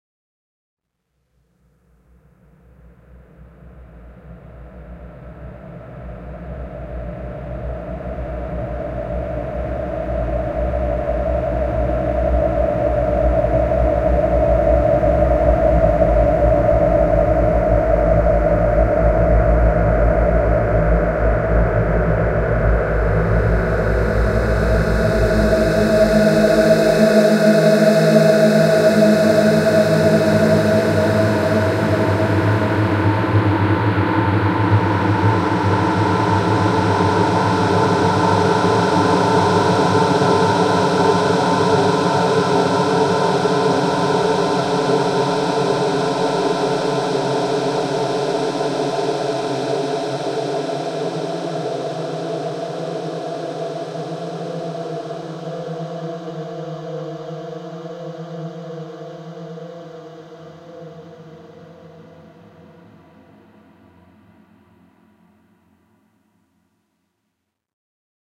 About one and a half minute of beautiful soundescapism created with Etheric Fields v 1.1 from 2MGT. Enjoy!
Ambient, Drone, Electronic